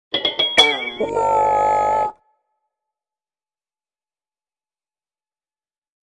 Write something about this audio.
twanger with banjo hit
A few twangy banjo notes followed by me singing "laa" in harmony. This was originally going to be used as a comic sound effect on a video podcast, but it wasn't used.